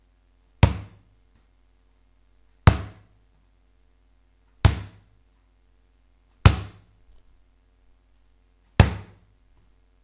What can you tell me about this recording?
ball bouncing on tiled floor
ball, bouncing